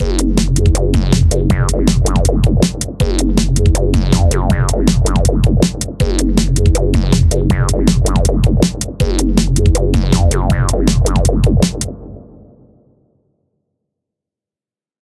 DnBbassline160bpm5+beat
Dark, acidic drum & bass bassline variations with beats at 160BPM
160bpm, acid, bassline, beat, dark, dnb, lfo